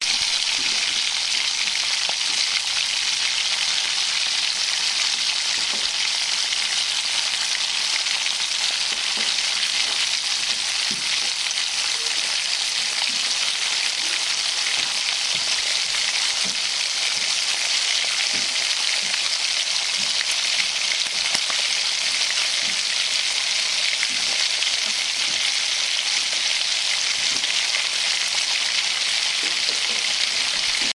Bacon sizzling in the pan. Recorded close in stereo.